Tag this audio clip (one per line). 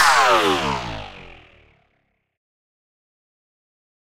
weapon
zap
sci-fi
shoot
lazer
laser